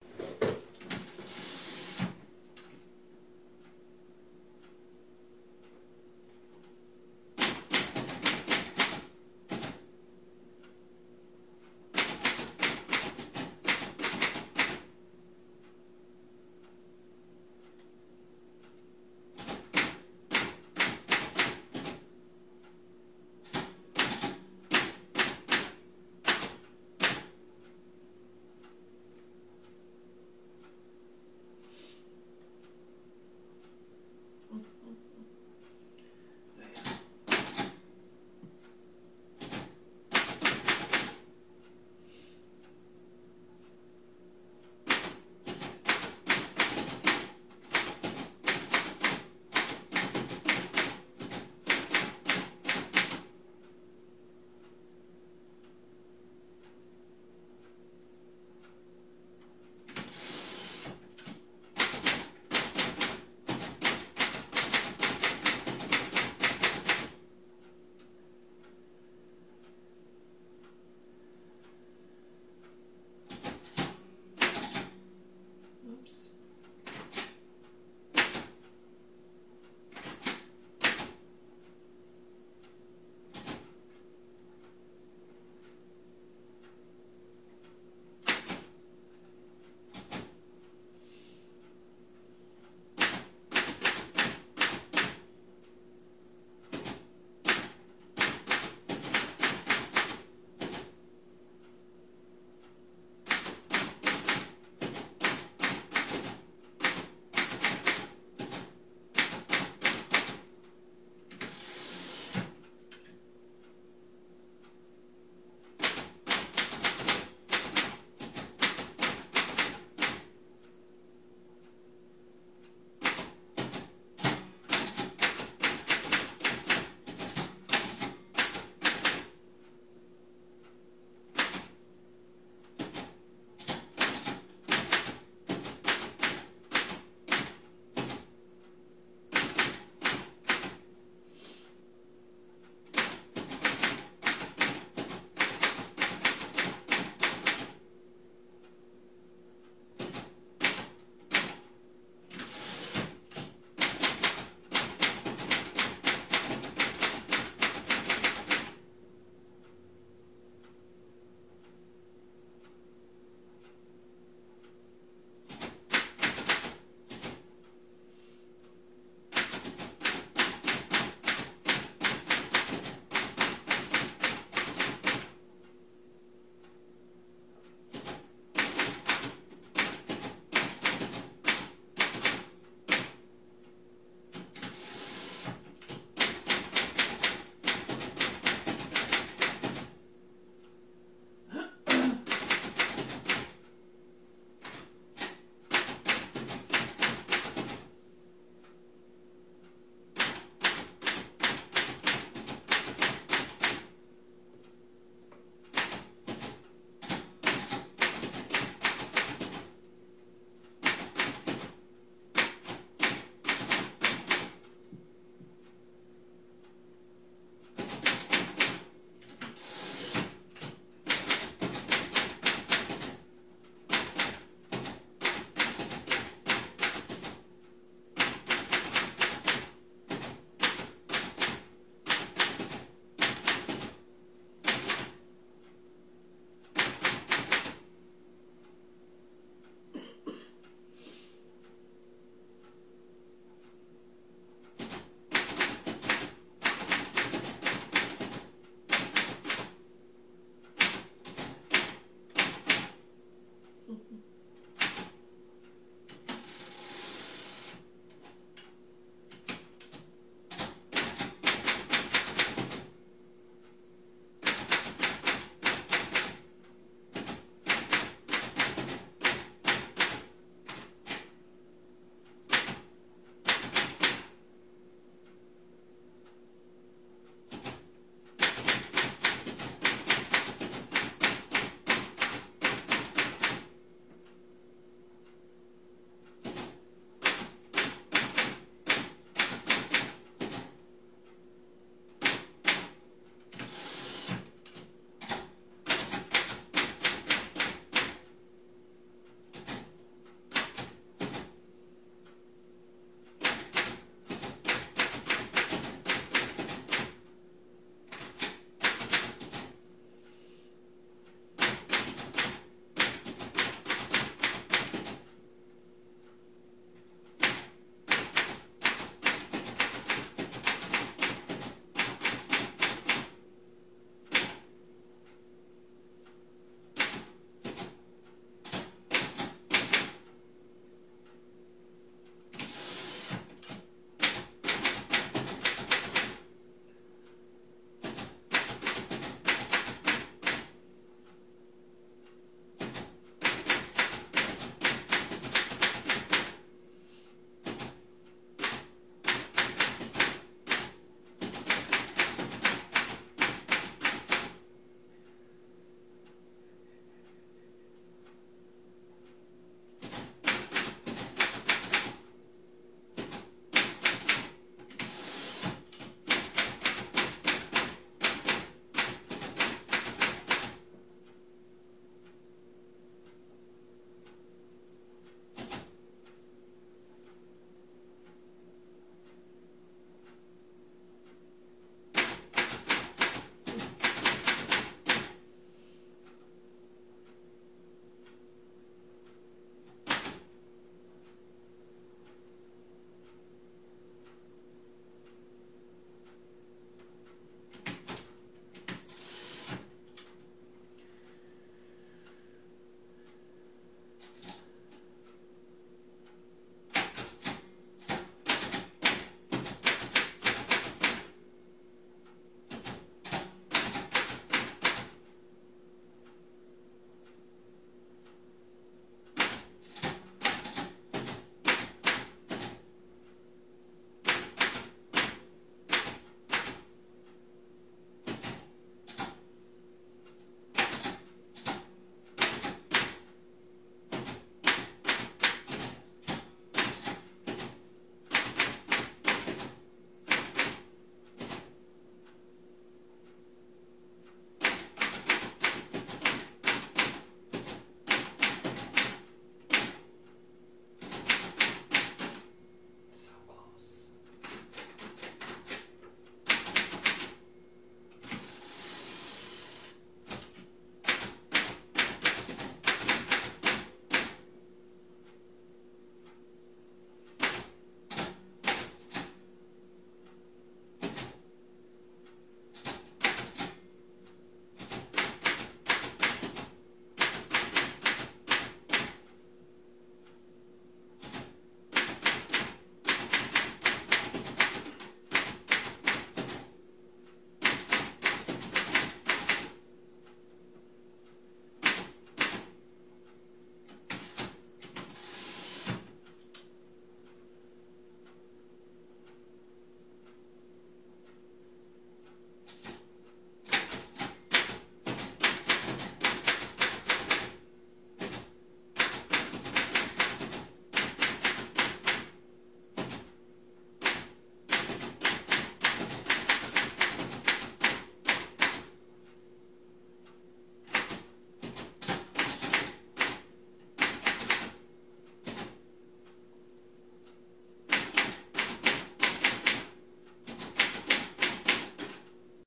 secretary typing on 1940s mechanical typewriter
A female receptionist starts out slowly, hunting and pecking on a mechanical typewriter. We occasionally hear her sniff, maybe a light cough, or muttering under her breath, but 90 seconds into it, she hits her stride and we hear the clack clack clack of the typewriter and the periodic bbbbbzzzzzzzz as she hits the carriage return lever.
1940s, detective, field-recording, mechanical-typewriter, noir, office, transcription, typing